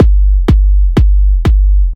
Heavy Kick with a bit of noise and a long tail.
[BPM: 124]
[Root: G1 - 49hz]